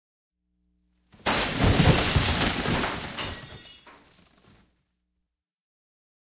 Accident fall drop topple
breaking, crash, dropping, fall, topple